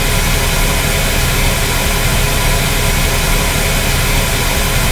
Created using spectral freezing max patch. Some may have pops and clicks or audible looping but shouldn't be hard to fix.
Soundscape, Atmospheric, Sound-Effect, Perpetual, Freeze, Everlasting, Still, Background